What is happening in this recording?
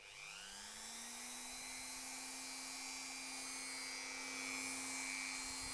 Dremel machine sound. Hand held rotary machine sound without been in contact with a surface. Sound Recorded using a Zoom H2. Audacity software used by normalize and introduce fade-in/fade-out in the sound.